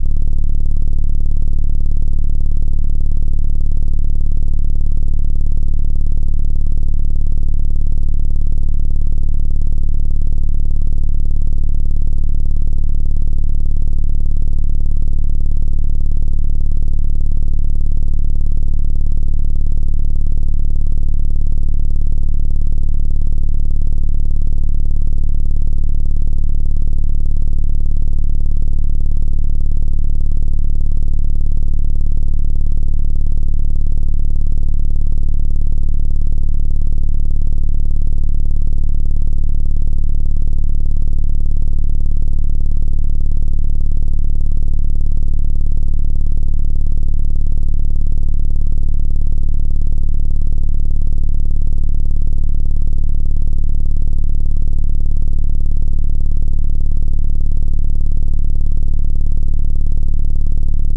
system 100 drones 7
A series of drone sounds created using a Roland System 100 modular synth. Lots of deep roaring bass.